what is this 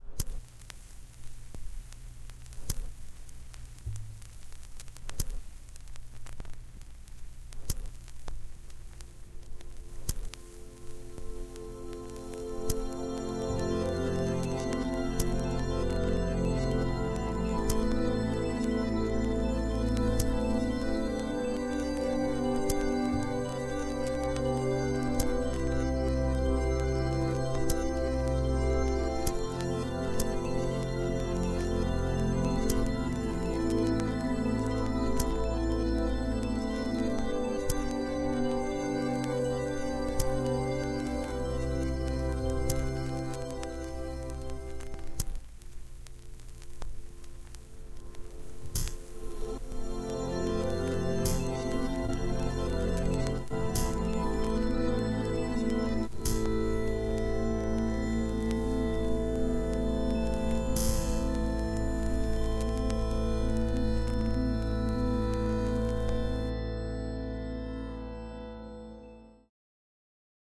first concrete piece of music
experimental & digital piece of music